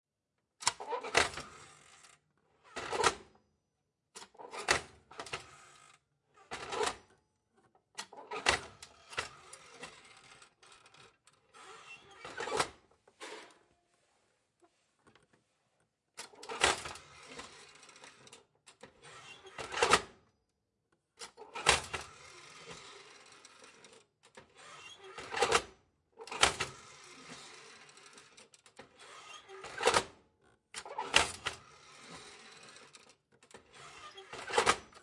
Old Electric Stove, Oven Door Open and Close with out Oven Shelf inside, Close Miked
Sounds recorded from an old electric stove, metal hinges, door and switches.
switch, fx, cooking, stove, door, kitchen, metal, household, oven, sound-effect, cook, house, sfx